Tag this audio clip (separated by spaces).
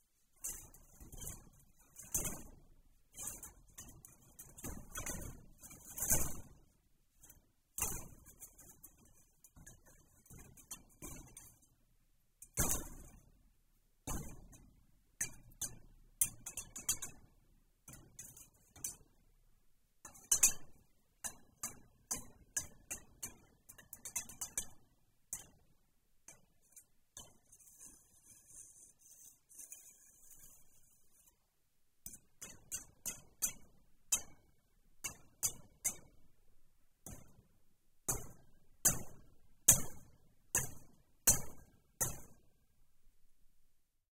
sfx field-recording